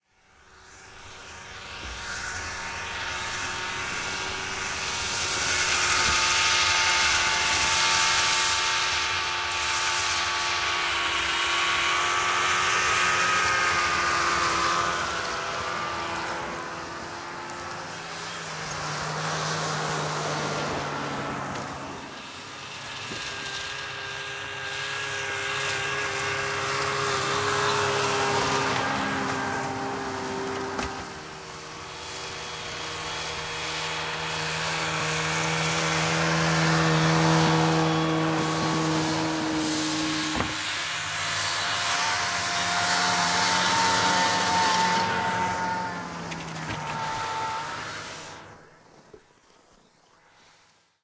snowmobiles pass by2
snowmobiles pass by
pass, snowmobiles, by